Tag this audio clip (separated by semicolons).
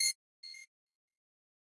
audio; beat; game; jungle; pc; sound; vicces